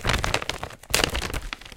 Soft clipped Crinkling of a beef jerky bag. Recorded very close to two condenser mics. These were recorded for an experiment that is supposed to make apparent the noise inherent in mics and preamps.